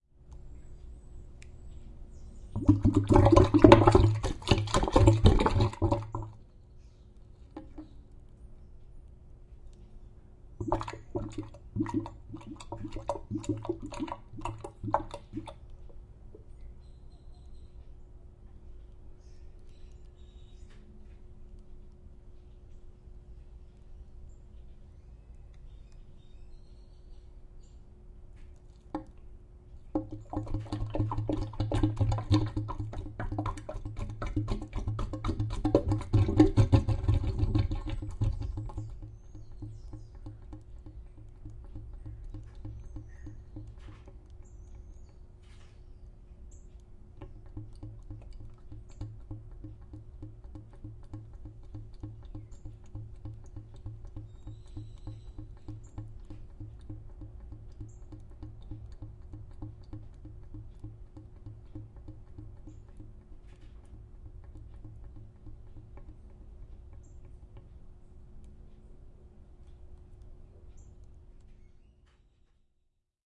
Drain Gurgle
recording of my washing machine draining as heard from the drain of the
kitchen sink. Morning birds can be heard outside window as well as
distant washing machine sounds. Recorded with a Rode Nt-4 microphone, Sound Devices MixPre preamp into a Sony Hi-Md recorder.
drain, draining, environmental-sounds-research, pipe, sound-effect, water